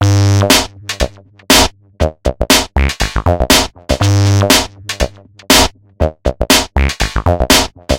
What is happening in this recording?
Massive Loop -47
An weird experimental electro loop with a minimal and melodic touch created with Massive within Reaktor from Native Instruments. Mastered with several plugins within Wavelab.
minimal, loop, drumloop